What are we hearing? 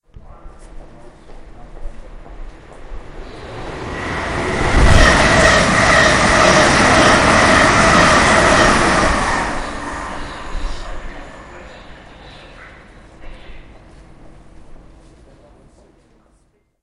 Train Flies by Station.
Recorded at Ilford train station near london, England.
fast, fast-train, train, train-flies-by, train-station